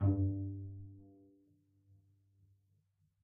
One-shot from Versilian Studios Chamber Orchestra 2: Community Edition sampling project.
Instrument family: Strings
Instrument: Cello Section
Articulation: tight pizzicato
Note: F#2
Midi note: 43
Midi velocity (center): 31
Microphone: 2x Rode NT1-A spaced pair, 1 Royer R-101.
Performer: Cristobal Cruz-Garcia, Addy Harris, Parker Ousley
cello, cello-section, fsharp2, midi-note-43, midi-velocity-31, multisample, single-note, strings, tight-pizzicato, vsco-2